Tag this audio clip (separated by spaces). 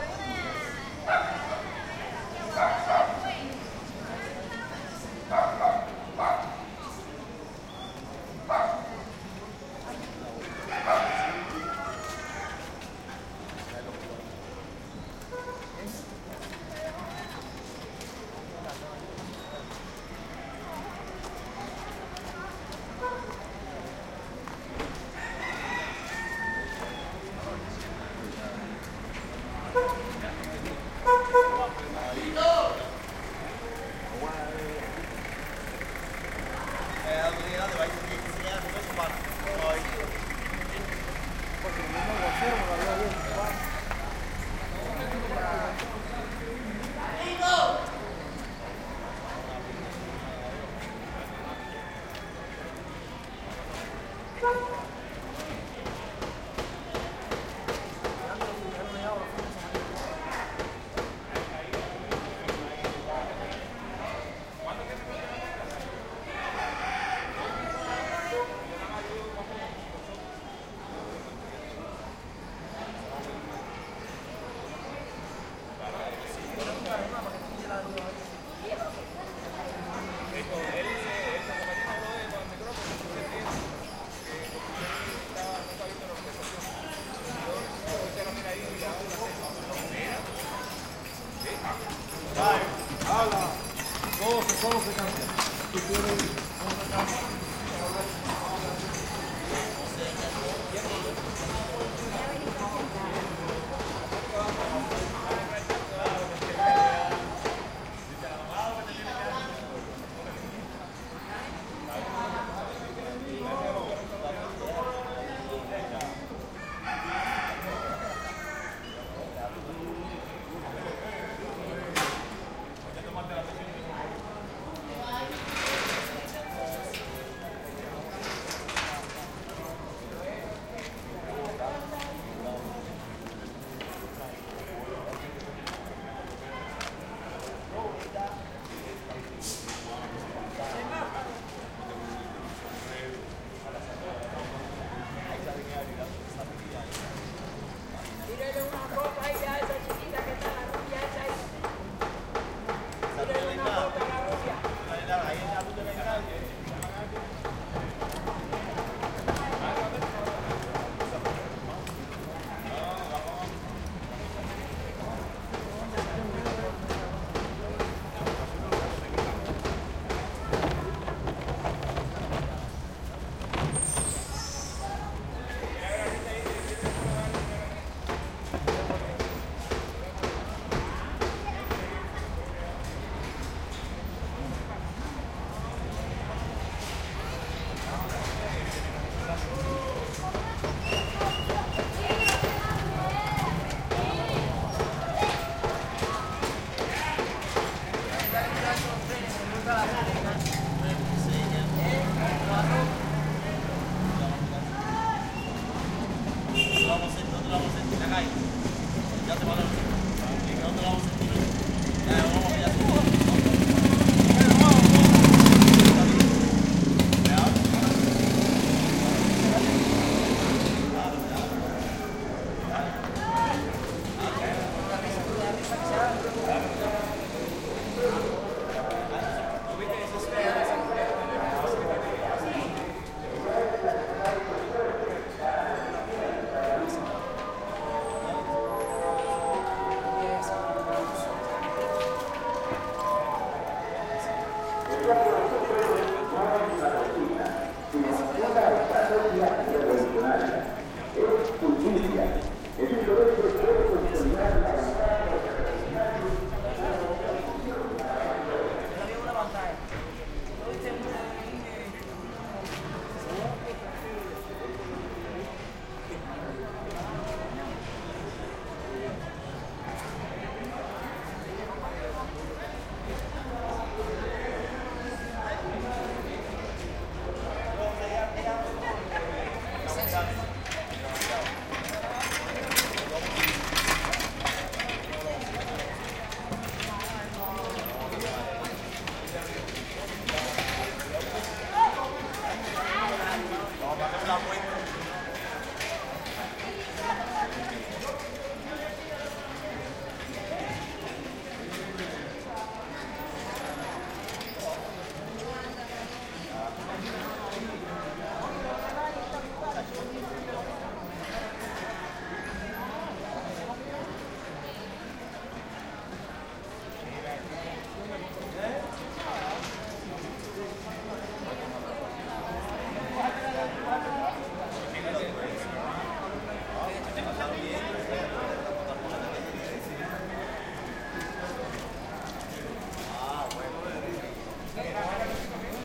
activity Cuba day field-recording Havana Old street